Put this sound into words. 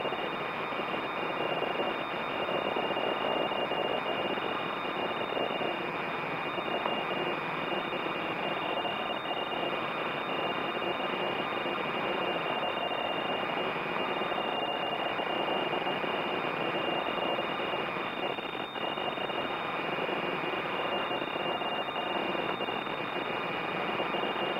Various recordings of different data transmissions over shortwave or HF radio frequencies.